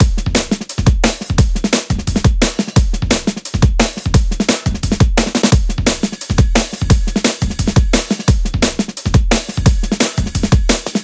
174 DnB Acoustic Loop
174-bpm, acoustic, breakbeat, dnb, drum, drumandbass, drum-loop, loop, percussion-loop